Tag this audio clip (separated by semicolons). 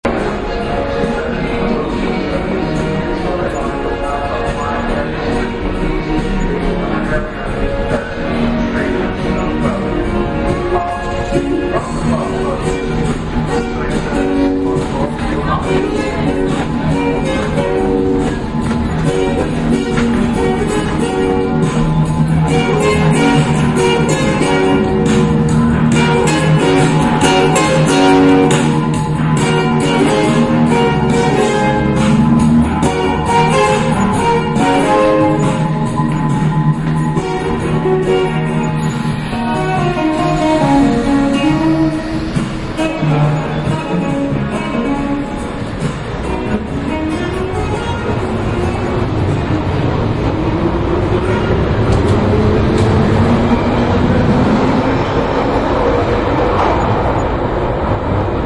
ambiance; ambience; ambient; background-sound; city; field-recording; london